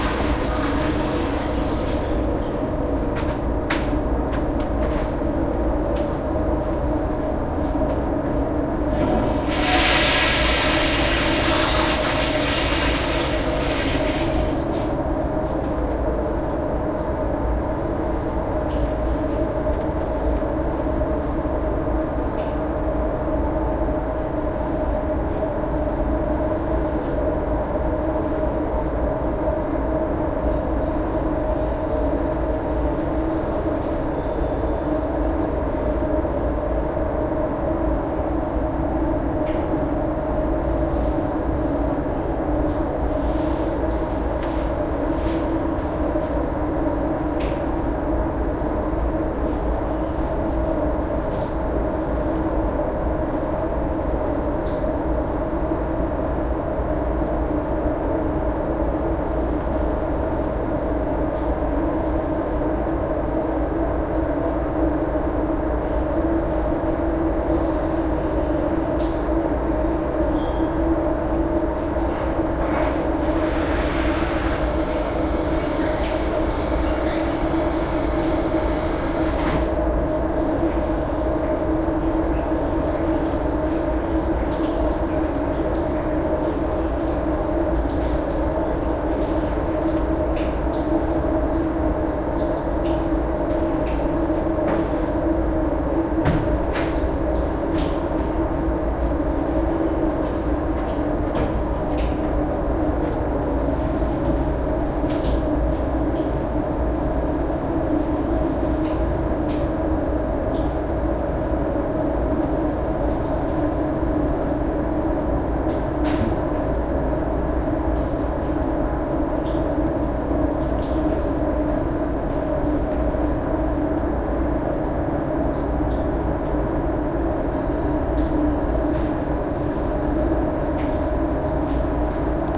bed ferryBathroom
Recordings made while waiting for the Washington State Ferry and at various locations on board.
ambience, ambient, boat, drone, environment, ferry, field-recording